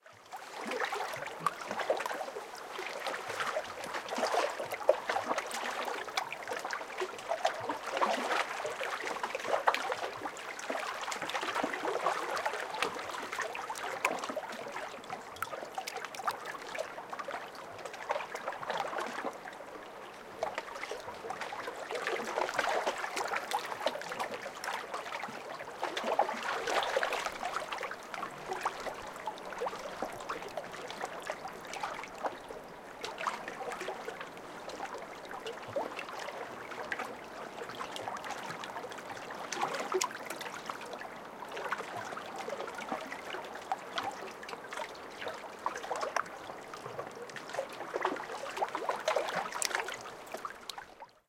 Lake Waves 2
A stereo field-recording of gentle waves on a lake shore. Recorded on the lee side of the lake on a dry stone wall which enters the lake. Reminiscent of being in a boat. Zoom H2 rear on-board mics.
field-recording, lake, lap, lapping, llyn-gwynant, shore, splash, stereo, tranquil, water, waves, xy